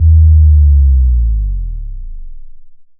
This is a free subdrop I made for one of my tutorial videos.